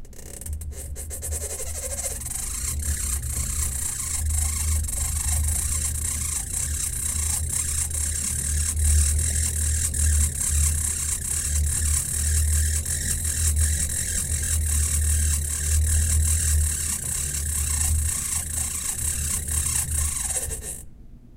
A recording of a broken elliptical exercise machine. Recorded with a Zoom H4 on 27 May 2013 in Neskowin, OR, USA.